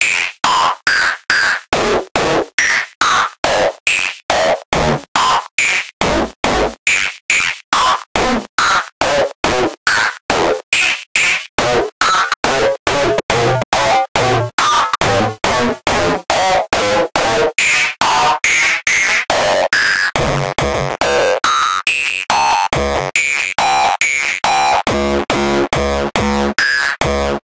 Kick 65 - 128. These 64 kicks are created with the help of the granulizer in FL Studio, automation of several parameters and randomized filter cutoff. The result was processed with the FL Blood distortion. Note that these kicks only comes from ONE sample. The automation does the rest. I uploaded them in bundles to minimize the stress for me to write down a good description.
bassdrum, crazy, distortion, filter, hardcore, hardstyle, mad, raw, resonance, timestretch